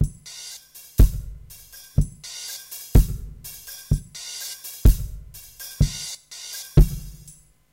Machine Beat 1
Simple beat. Industrial theme.